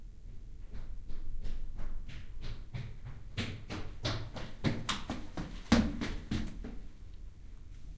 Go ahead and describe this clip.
Binaural stereo recording of running footsteps getting closer down a hall with wooden flooring.